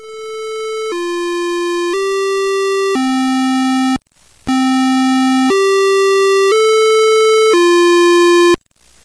Westminster Default
Played at my House When Abran Pressed the Button